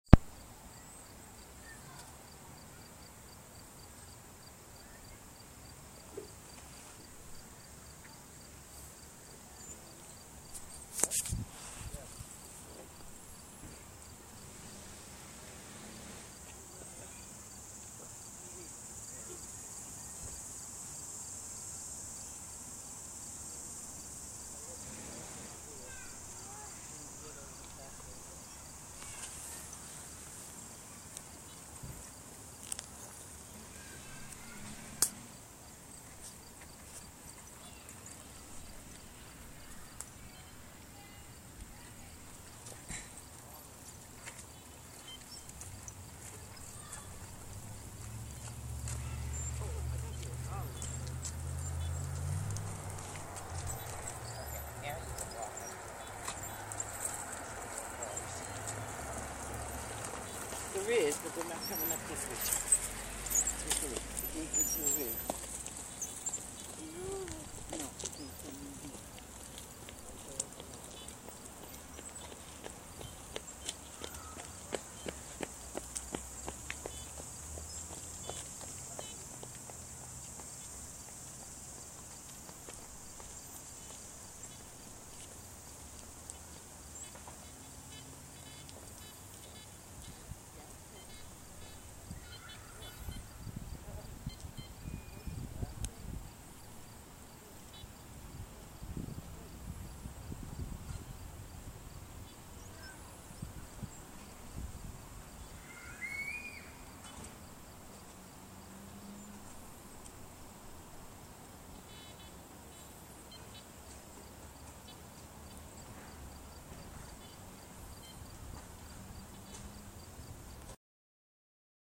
Conrose Park - Railtrack
Empty park sounds
ambient, birds, bugs, Nature, trees